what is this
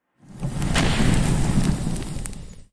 Tree Falls and Burns Down
consume, spell, burning, burn, fire-magic, quick, cast, hot, consumed, fire, magic, flame, destruction, caster, ignition, flames, flaming